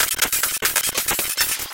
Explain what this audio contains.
IDM Click 2.2
Glitch Clicks..... No?
999-bpm, cinema, glitch, idm, melody, soundscape